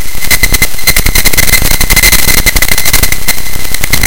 Earth City 5rip
A sample from a databent file in Audacity. Use and abuse at will :)
raw, glitch, sample, databending, unprocessed